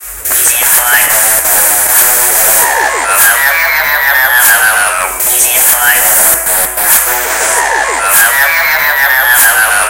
What Can I Say!I'm Crazy 4 Techno.